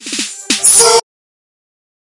a very short excerpt from a piece of music I started to make but abandoned. the clip is percussion and female vocal with effects. I used both Abelton live 9 and Reaper for the original piece.